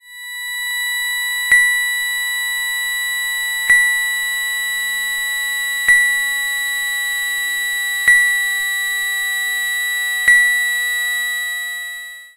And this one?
High-frequency sine wave with glassy blips. Made on an Alesis Micron.